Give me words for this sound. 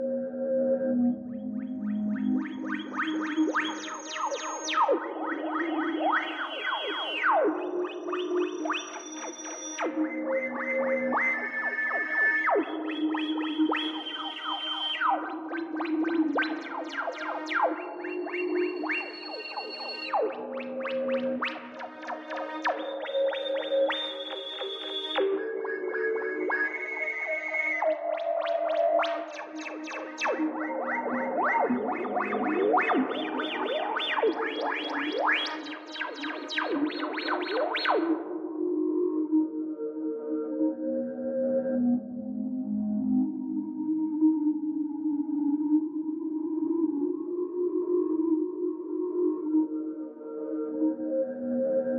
harmonic madness
Weird harmonic spacey loop made with Korg Triton
space, harmonic, weird, discordance, synth, signals